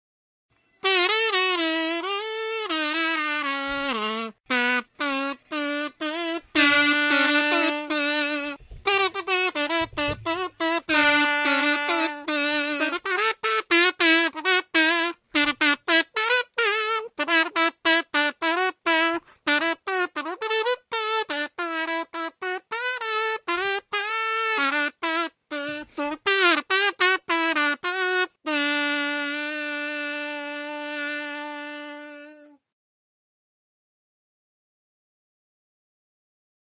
My little aaaaaaaaaaaaaaaaaa
kazoo, MLP-song